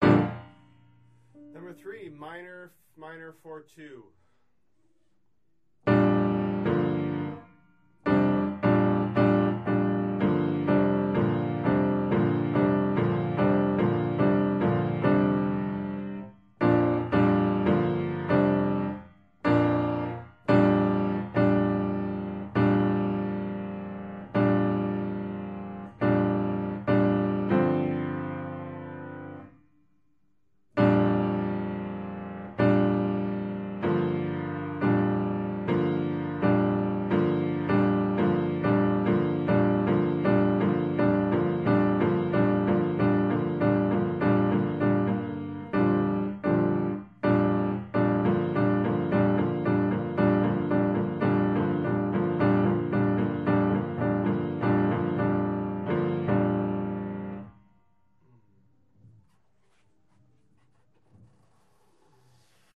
Practice Files from one day of Piano Practice (140502)
Logging, Piano, Practice